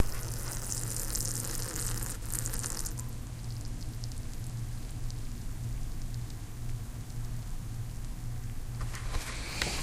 raw mysterypee
I think these are the recordings I remember making in Vero Beach FL. I kept hearing gun shots coming from the west and assume they were wind blown sounds of outdoor gun range somewhere near there. I had to take a leak.
birds, field-recording, gun, plane, wind